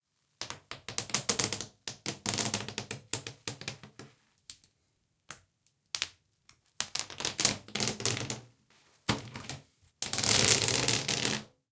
Suction cups coming off in the bath. Please write in the comments where you used this sound. Thanks!